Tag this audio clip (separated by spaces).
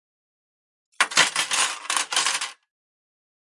cutlery fork knife placing plate spoon tray